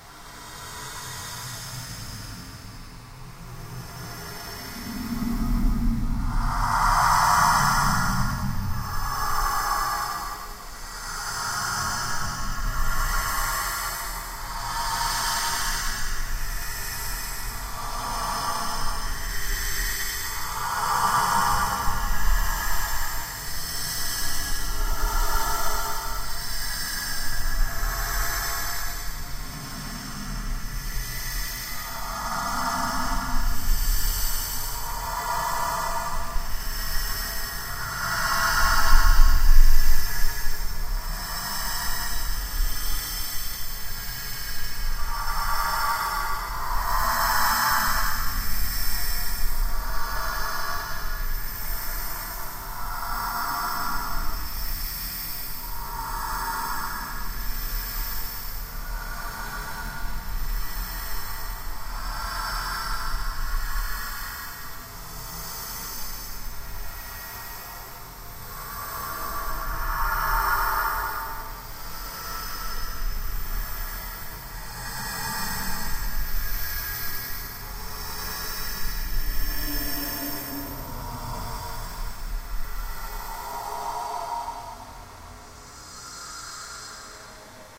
Distant horror ambient
A sound i created in audacity using paulstretch and a couple of pitch, tempo and trebble boost effects.
Ambience, Ambient, Horror, Scary, Suspense, Thriller